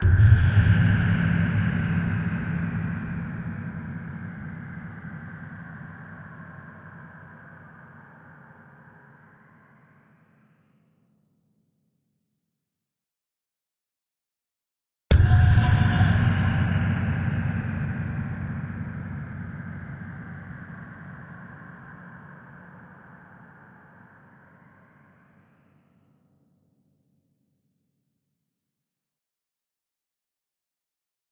Designed cinematic drone - winter strike - far away and airy - x2.
design, drone, fi, fiction, sci, science, sound, sweep, tone